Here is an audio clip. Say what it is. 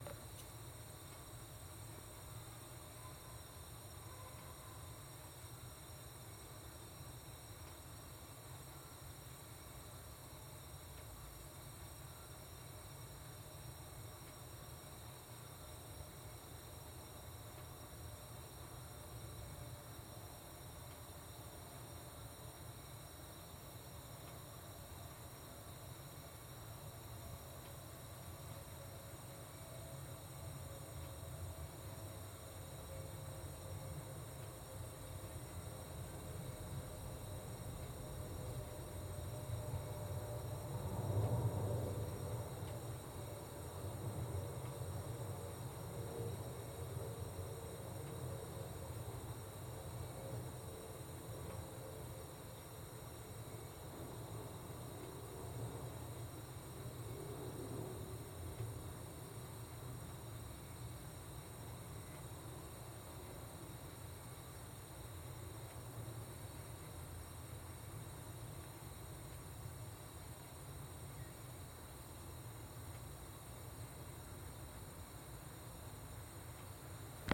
Gaz pipeline with an airplane's passage.